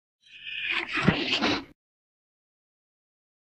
Good day.
Recorded with webcam - street sound, reversed.
Support project using